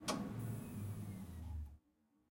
Shut down of PC.